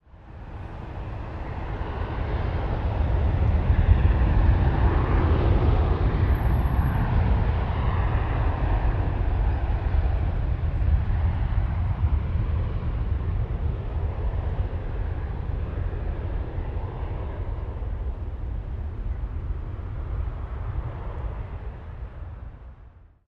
A commercial jet plane taking off (all of these takeoffs were recorded at a distance, so they sound far away. I was at the landing end of the runway).